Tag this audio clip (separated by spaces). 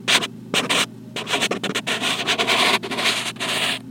Foley sample